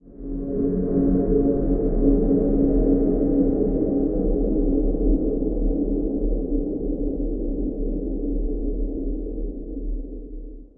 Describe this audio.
Dark Emptiness 023
soundscapes, flims, pad, sound, terrifying, cinematic, atmo, drone, dramatic, ambient, dark, sinister, experimental, tenebroso, film, creepy, oscuro, suspense, drama, effects, atmosphere, deep, game, terror